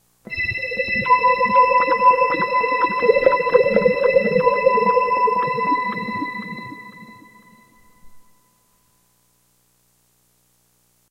Orbit Shuffle 1
A cool sound from my Roland GR-33 guitar synth.
Guitar, Midi, Gr-33, SynthSpacey, GuitarRoland